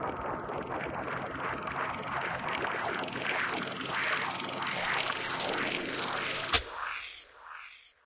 I added a wobbly LFO to it.